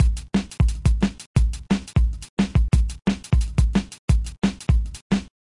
175 fs punchy
bass, dnb, drum, loops